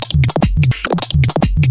processed with a KP3.
fast, fill, glitch, processed, water